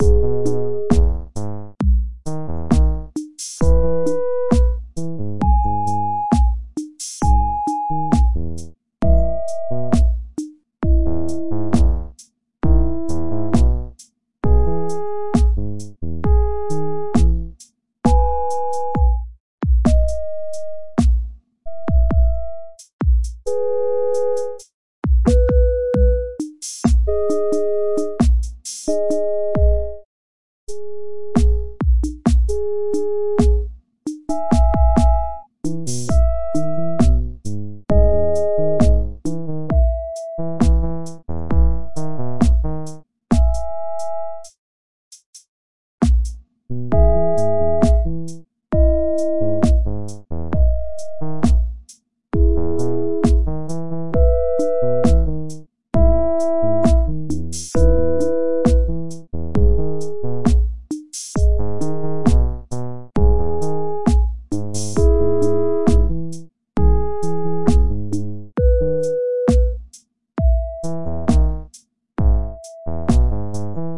Puckr music Level 1 - 133bpm
Fragment of algorithmically generated music that me and two of my colleagues made for a the video game called Puckr (video here, although the music featured in the video is not the music we made). Puckr was an adaptation of air hockey for the Reactable, and features different playing levels as the game advanced. The other sounds in this pack show an example of the music that was played for each level.
The music is generated using Pure Data, a software for music processing nerds. We defined a set of rules for the generation of notes and drum patterns and then the system does the rest automatically and creates an infinite stream of music. Here I just recorded a number of bars.
133bpm, loop, video-game, game, synth, algorithmic, electronic